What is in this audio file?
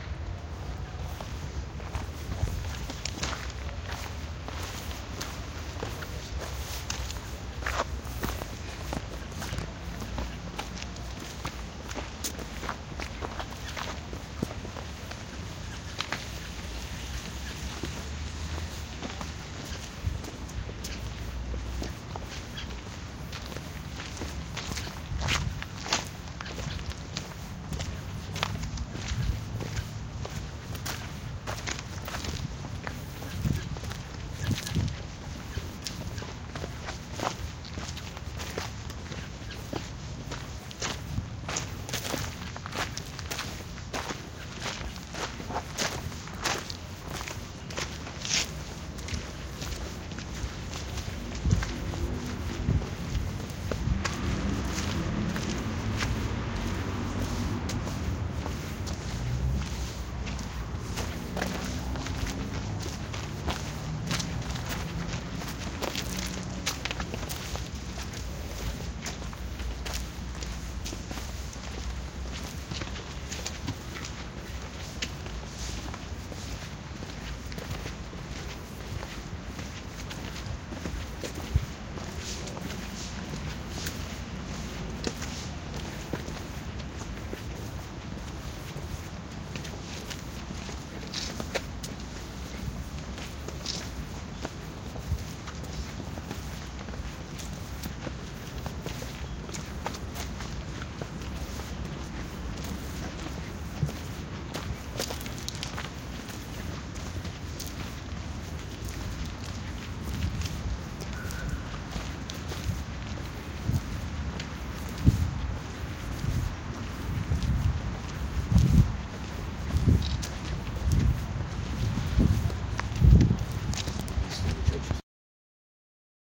Patrimonio quinta de Bolivar-Toma 4- David Cárdenas y Natalia Niño- 25:02:20 9.33
Toma combinada a dos micrófonos de la entrada del parque de agua hasta la entrada de la Quinta de Bolivar. Para la realización de estas tomas se utilizó un teléfono celular kalley black pro y moto G5. Este trabajo fue realizado dentro del marco de la clase de patrimonio del programa de música, facultad de artes de la Universidad Antonio Nariño 2020 I. Este grupo está conformado por los estudiantes Natalia Niño, Evelyn Robayo, Daniel Castro, David Cárdenas y el profesor David Carrascal.
paisajesonido, soundscape, artesonoro